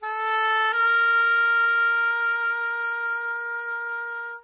Non-sense sax played like a toy. Recorded mono with dynamic mic over the right hand.